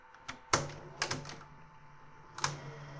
08 REVIEW START
Recording of a Panasonic NV-J30HQ VCR.
cassette, loop, pack, recording, retro, tape, vcr, vhs